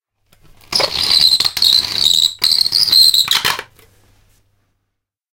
Shivering Sound 10 - Fork on metal
Really unpleasant noises in this pack.
They were made for a study about sounds that creates a shiver.
Not a "psychological" but a physical one.
Interior - Mono recording.
Tascam DAT DA-P1 recorder + AKG SE300B microphones - CK91 capsules (cardioid)